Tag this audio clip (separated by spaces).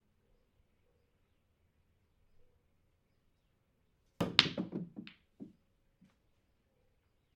Guys Drinks Chalk Sinking Balls Break Cue Resin OWI Billiards Game Velt Plastic Snooker Ivory wood Triangle Bar Pool Free Pub